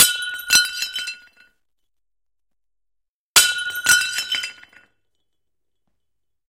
Breaking plate 1
A plate being dropped and breaking on impact
Recorded with:
Zoom H4n on 90° XY Stereo setup
Octava MK-012 ORTF Stereo setup
The recordings are in this order.
xy, floor, falling, glasses, dropping, glass, breaking, ortf